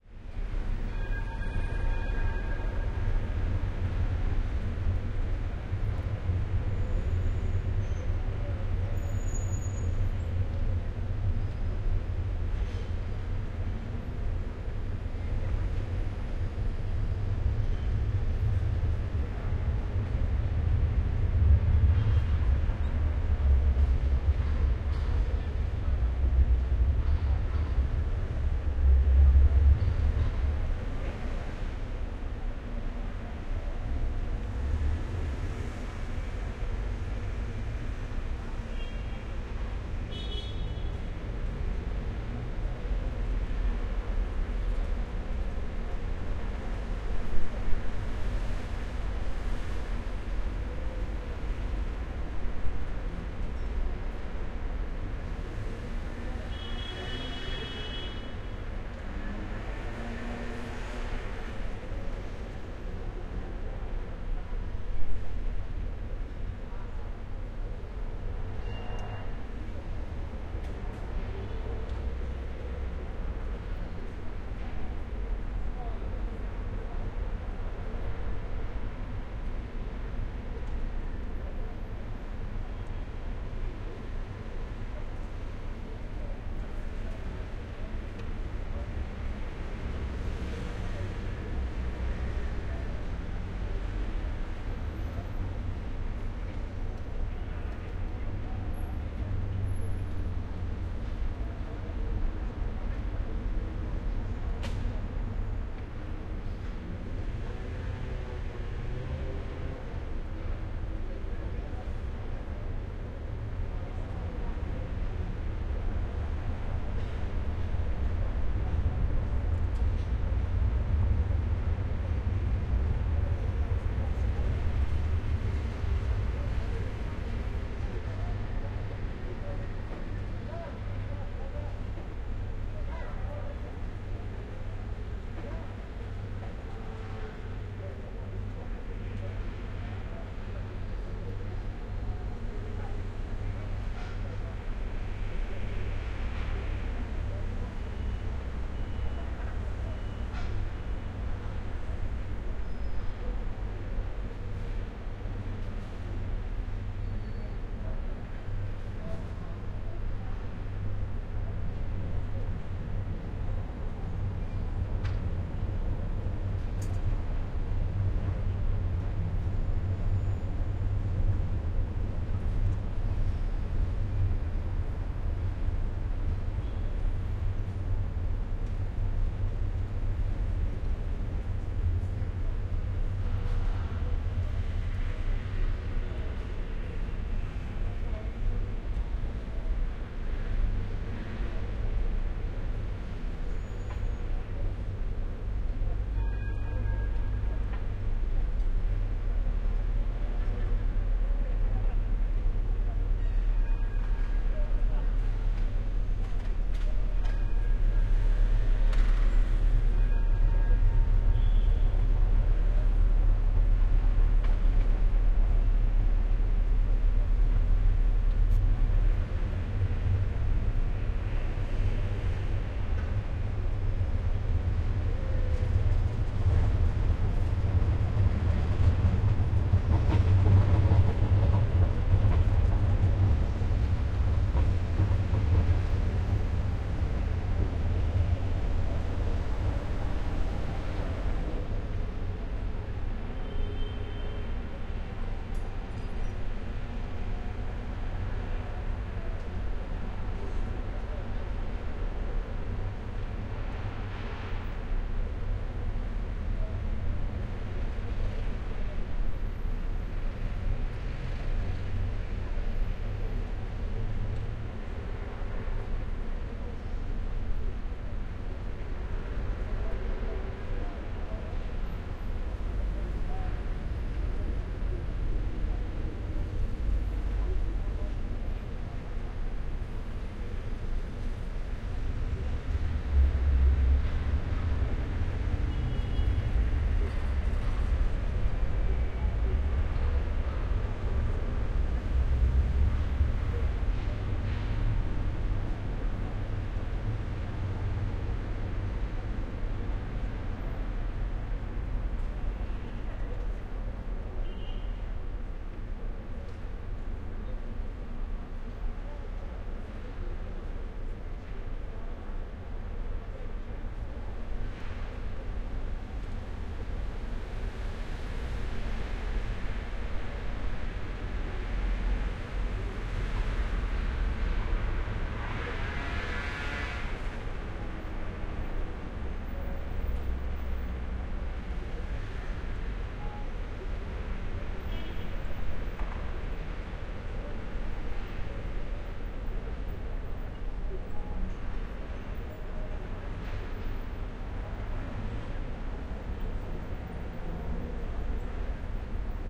Marseille (distant)
City, Distant, Marseille